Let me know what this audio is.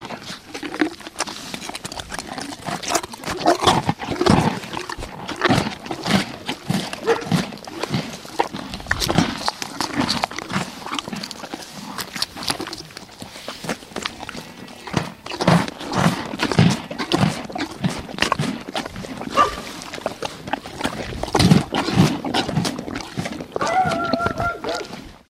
A horse eating a bread roll. In the background, a dog is barking and whining. Recorded with a FlashMic.
horse, farm, eating, nature, field-recording